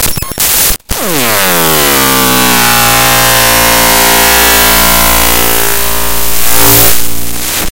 system32Aurora
created by importing raw data into sony sound forge and then re-exporting as an audio file.
clicks, data, glitches, harsh, raw